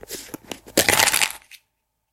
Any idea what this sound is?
Just as the title says, a nice clean sound of popping open some pills
Recorded with HDR PJ260V then edited using Audacity
close, crack, flip, medicine, open, opening, pills, pop, popping, snap